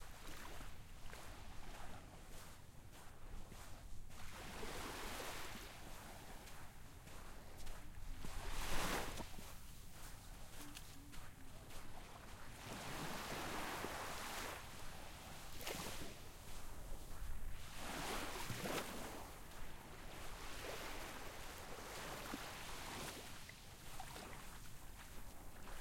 Recorded in Destin Florida
Walking on a sandy beach in tennis shoes. Also with waves included.